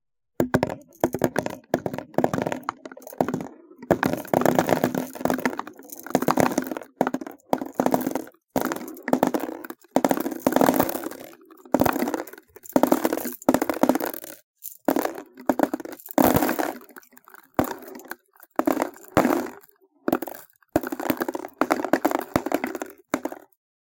Steel Balls Dropping Into Cardboard Box
Steel ball bearings dropping into a cardboard box.
ball-bearings, drop, dropping, dropping-ball-bearings, dropping-balls, metal-balls, plunk, steel-balls